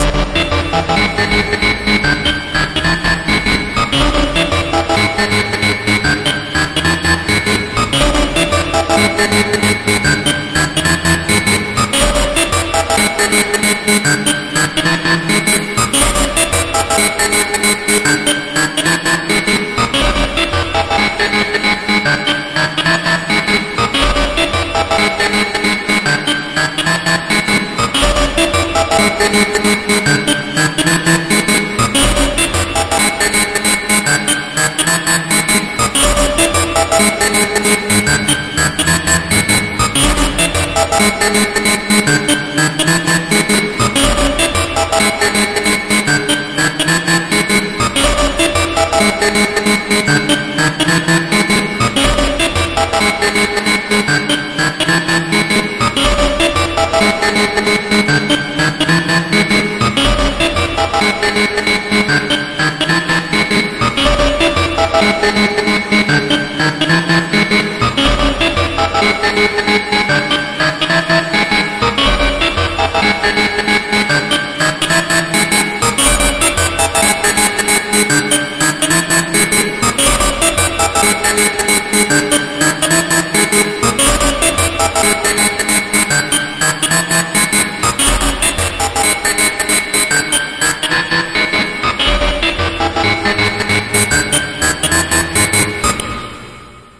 A groovy distorted melody somewhere between 90's videogames and oldschool techno.
game, game-sounds, gamesounds, melody, oldschool-techno, techno, videogame, videogames